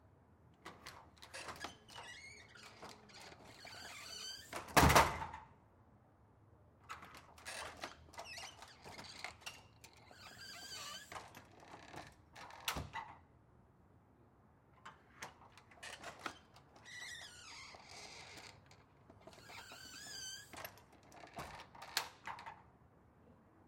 FOLEY Ext ScreenDoor 001
A screen door opening and closing at various speeds. Night exterior.
Recorded with: Sanken CS-1e, Fostex FR2Le
hinge, close, creak, door, squeak, slam, open, screen